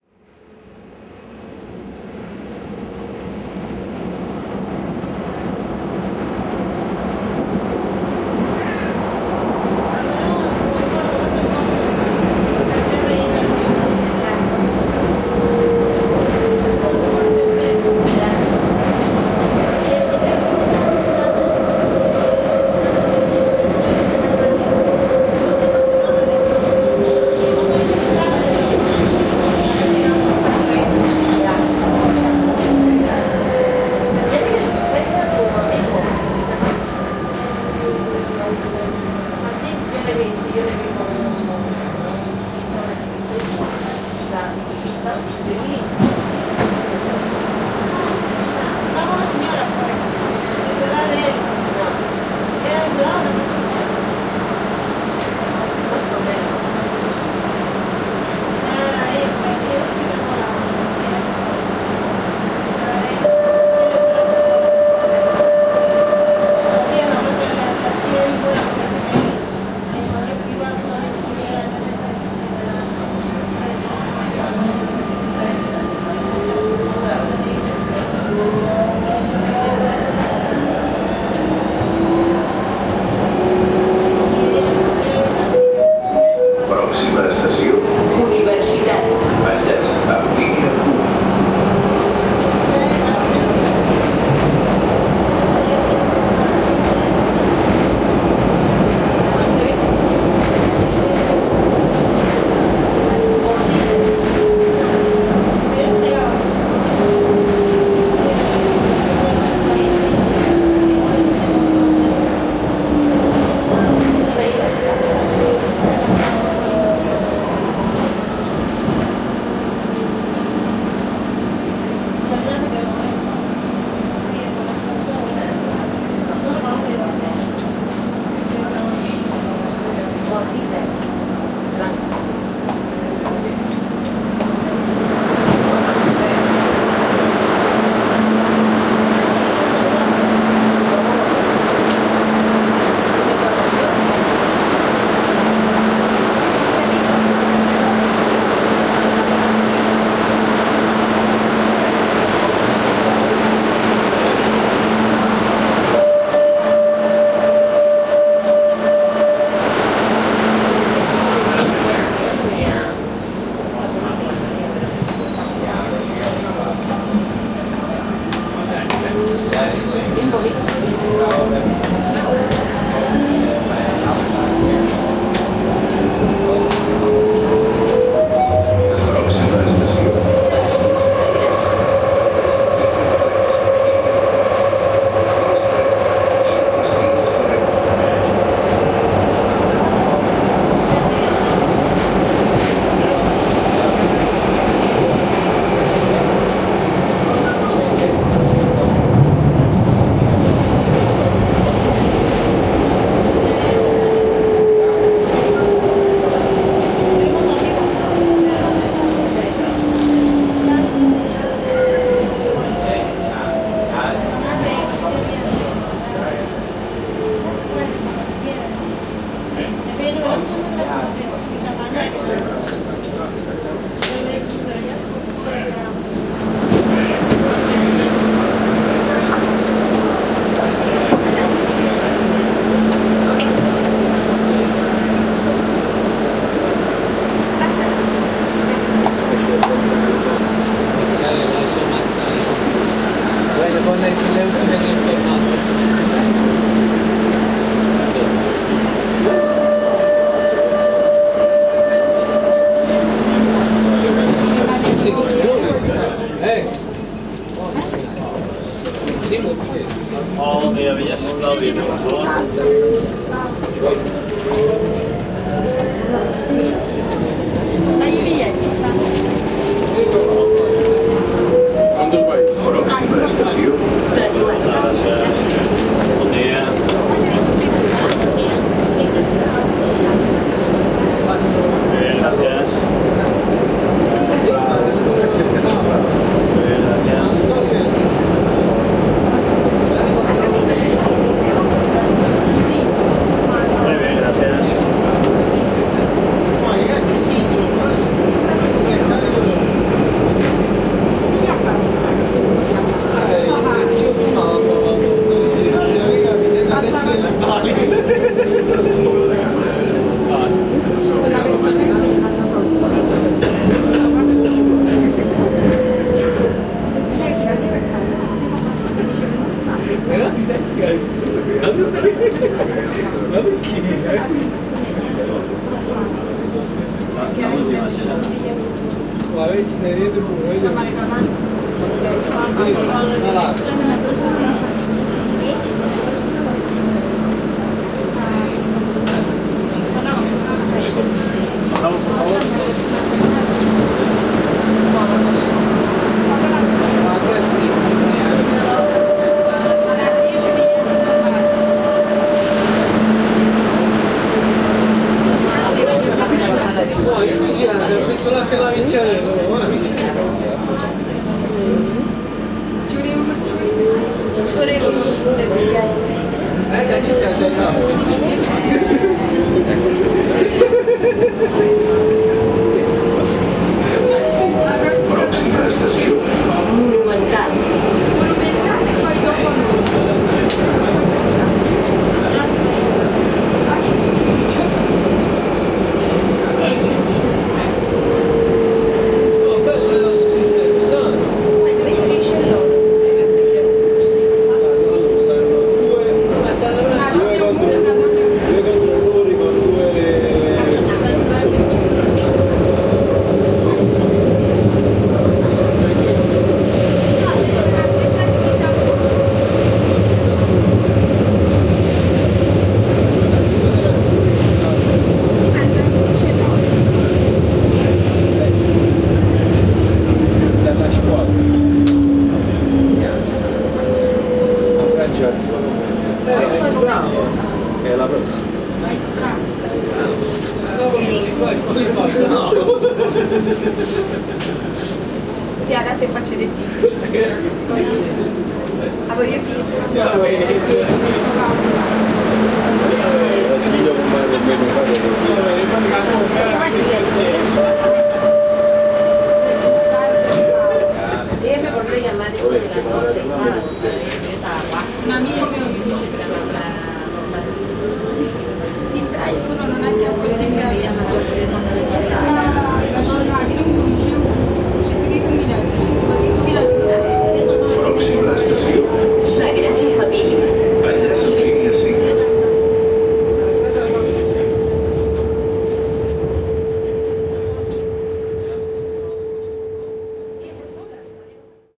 Barcelona's Subway train sounds.
It's part from any modern city. People talking while it's moved by the underground from one place to another. Noise from machines. Acoustic signals. Booting. Stopping. After opening doors, a drastic level difference, due to external noises coming from the stations, amplified by their architecture: closed and using stoned materials. Mechanical noises moving wagons. Mechanical voices through the speakers to site passengers. Suddenly, in a stop, a control of the tickets. Tickets are required to the passengers, and thanks are given to those that payed. They go down on the next station. Sounds keep going: passengers talking, wheels over the rails, taca-taca from the hard parts on the wagon... and, always, motor sounds, lots of them...
soundscape, barcelona, subway, train